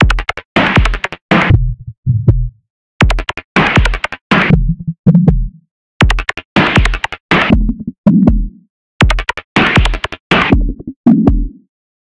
20140914 attackloop 160BPM 4 4 loop1.2
This is a loop created with the Waldorf Attack VST Drum Synth and it is a part of the 20140914_attackloop_160BPM_4/4_loop_pack. The loop was created using Cubase 7.5. Each loop is a different variation with various effects applied: Step filters, Guitar Rig 5, AmpSimulator and PSP 6.8 MultiDelay. Mastering was dons using iZotome Ozone 5. Everything is at 160 bpm and measure 4/4. Enjoy!
rhythmic, loop, hard, 160BPM, electronic, electro